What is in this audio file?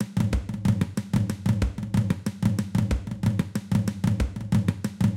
ethnic beat6
congas, ethnic drums, grooves